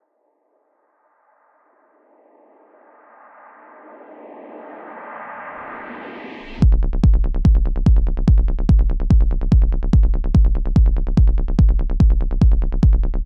This is a basic Psytrance bassline with a buildup made in Ableton.